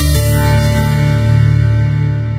UI Correct button5
game button ui menu click option select switch interface
button, click, game, interface, menu, option, select, short, switch, synthetic, ui